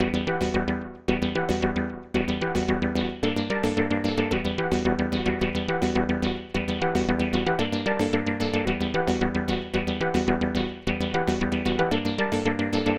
globe run synth
synth like u running the globe
loop, electro, synth, waldorf, electronic, arpeggio